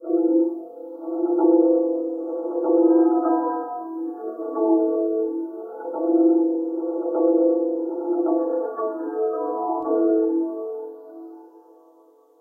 Patch #?? - Sounds like church bells a little. Another really sad sounding line. >> Part of a set of New Age synths, all made with AnologX Virtual Piano.